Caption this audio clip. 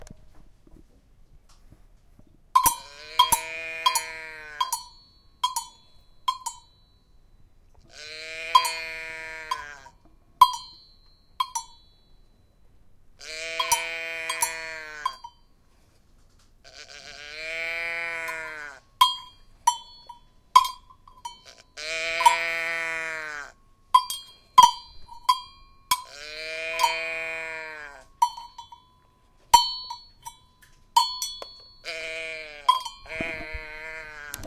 Sound of a cow with bell made with a cow sound toy and agogo bells.